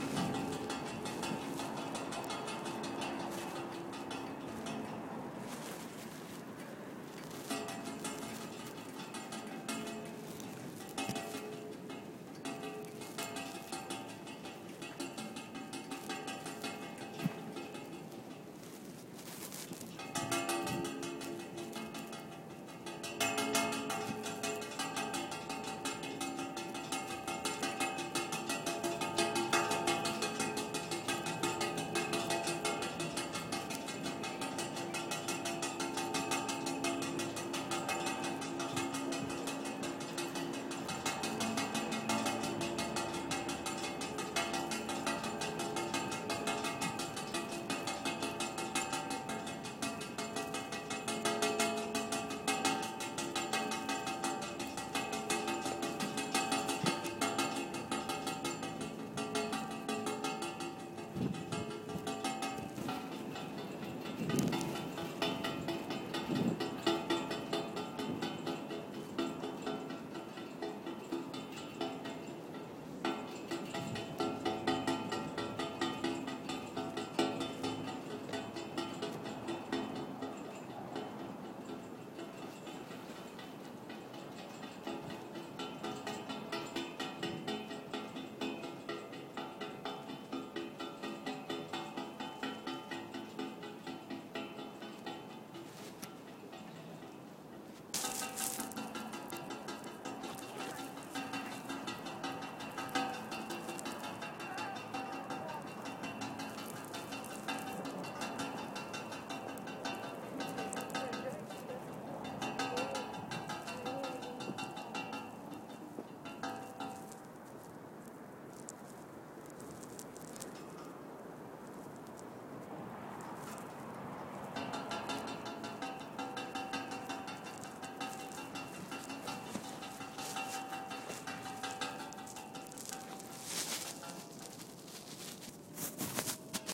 flagpole line hitting pole in wind
flagpole, metal, wind